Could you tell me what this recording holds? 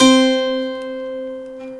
A few notes sampled for demonstration purposes from a portuguese Braguesa guitar ("Viola Braguesa"). The sampled instrument was built by Domingos Machado, a remarkable popular luthier from Tebosa, Braga, in the north of Portugal.
The file name indicates the sampled note.
Recording setup:
Microphone - Behringer B2-Pro Condenser Microphone
Audio interface - LINE 6 TonePort UX2
Software - Cakewalk SONAR
Date: November 2015